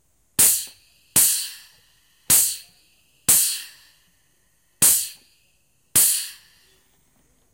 recording of a pneumatic grease bomb working, air blowing and piston moving are present
recording, piston, air, blow